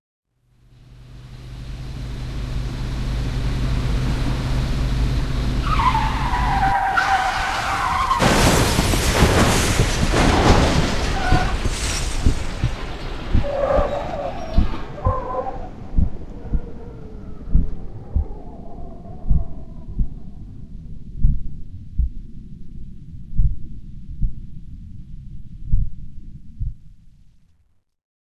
CarCrash heartbeat-SloMo
Car crash that fades to slowing heartbeat.
Car, Heartbeat, Slow-Motion